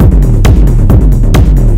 this is made with the legendary rebirth rb-338 from the propellerheads.
rebirth is a 1x dr808,1x dr909 and 2x tb303 emulation of these legendary roland instruments for pc.
you can get a free copy of the program rebirth rb-338 by visiting the rebirth museum site.
(after registering u get the original downloadfile on their webpage for free)
so best wishes and friendly greetings from berlin-city,germany!
303, 338, 808, 909, drum, drumloop, drums, electro, loop, rebirth, roland, sequence, tekno, trance